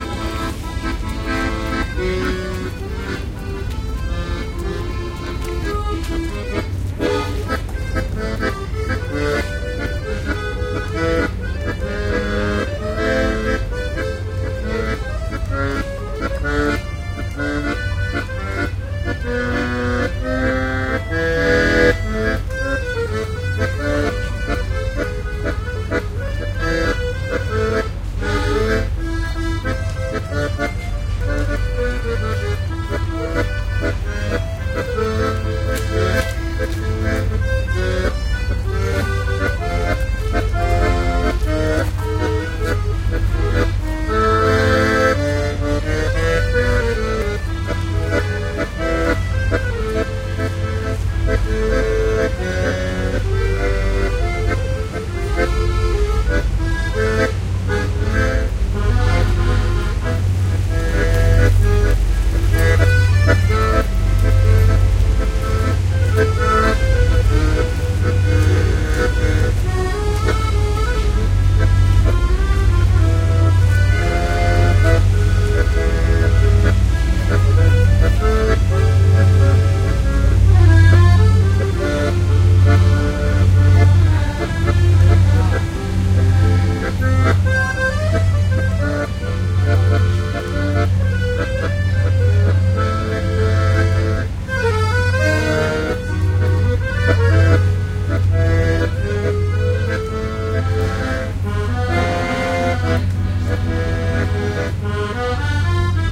street accordeonist
Accordionist from eastern europe improvising tango music near the river Rhine in Cologne, Germany. Marantz PMD 671, Vivanco EM35
accordion, city, downtown, field-recording, music, people, street, tango